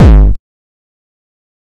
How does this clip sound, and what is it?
Hardcore Bass 4
Powerfull bass. Enjoy!
trance, hardcore, bass, party, gabber